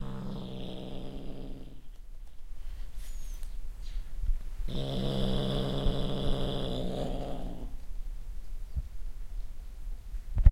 Cat Growling 001
My kitten growling as I try to remove her catnip toy from her jaws.